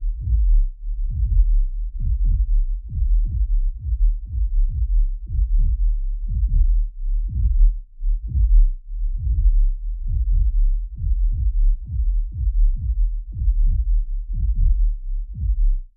irregular heartbeat
took a sample of a tr909 kick drum, stretched it, added some reverb, bass boost, eq, compression, split the track and offset it slightly to create an irregular pulse...
beat, body, heartbeat, pulse